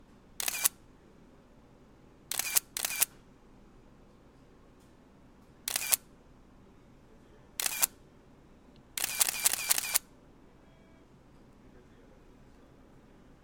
The sound of a Nikon F3 camera with optional motor drive attached. This camera was produced from 1980 until 2001. First a few frames and then on "continuous" mode for a few frame.